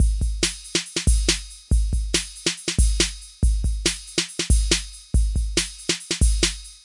140 bpm drum beat
House, DnB